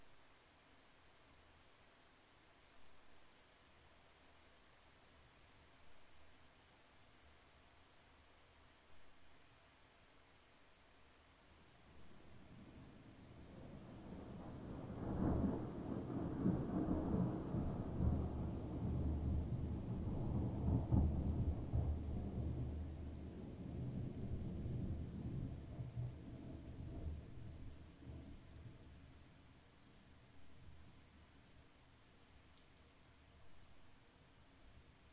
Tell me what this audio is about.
thunder noise 001
single thunder burst with light rain
thunder, field-recording, rain